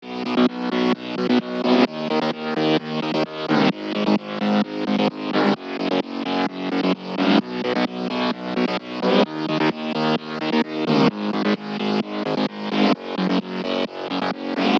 Arp Bass Loop 128 bpm
arp bass loop synth Sylenth1 progressive house created in fl studio.
Synth, Loop, Arp, Bass, bpm, Progressive, EDM, 128, Dance, Electric-Dance-Music, House